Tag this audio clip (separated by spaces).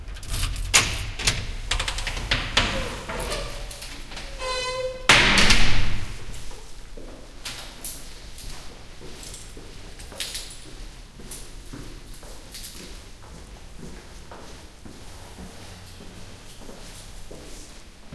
field-recording door opening